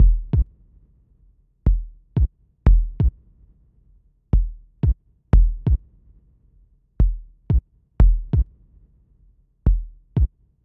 the cube bombo
They have been created with diverse software on Windows and Linux (drumboxes, synths and samplers) and processed with some FX.
bass, bassdrum, fx, loops, pack, percussion, remix, sample-pack, synths, the-cube